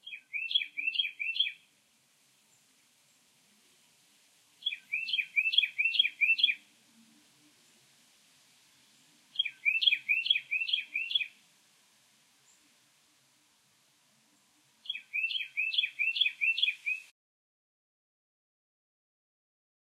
bird tweets 01
A bird tweeting.
bird, tweet